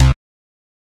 Synth Bass 023
A collection of Samples, sampled from the Nord Lead.
bass, lead, nord, synth